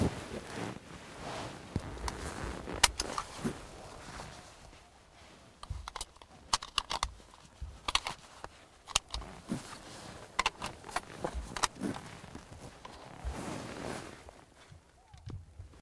Loading bullets into Sauer 404 magazine while seated in a high seat position
ammunition, bullet, bullets, casing, casings, cock, gun, load, loading, magazine, reload, reloading, rifle, shell